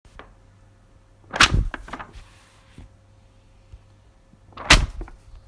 hit paper
hit, paper, percussive